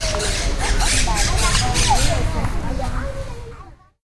Call of a Monk Parakeet (cotorra grisa, scientific name: Myiopsitta monachus) and ambient sounds of the zoo.